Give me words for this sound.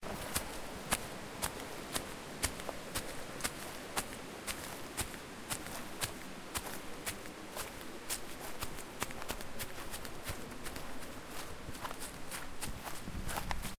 heavy steps on grass
steps, walking, grass, footsteps, walk